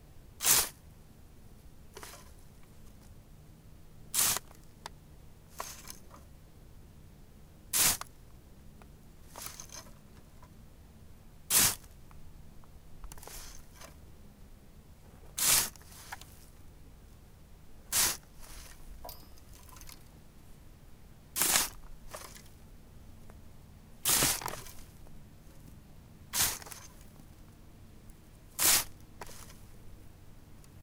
Rake Shoveling
A plastic rake being used as a shovel for coarse sand.
garden, tool, shovel, scoop, rake